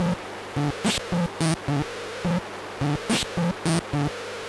Elek Perc Loop 003 Var8
A synth percussion loop straight from the Mute Synth 2.
Slight tweaks to knobs produced a new variant.
REcorded straight into the laptop mic input.
No effects or post-processing. Simply cut and trimmed in Audacity.